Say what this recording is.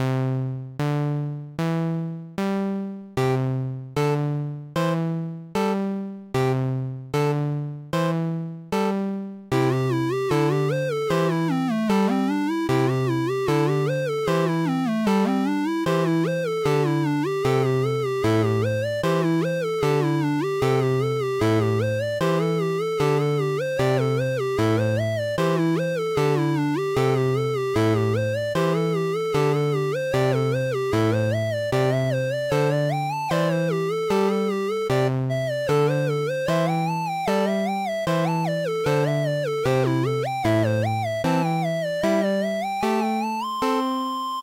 Pixel Song # 25
Loopable, Music, Pixel